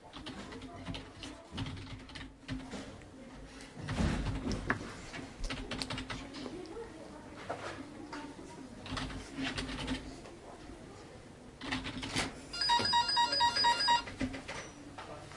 session 3 LBFR Amélie & Bryan [1]
Here are the recordings after a hunting sounds made in all the school. Trying to find the source of the sound, the place where it was recorded...
france, labinquenais, rennes, sonicsnaps, thecityrngs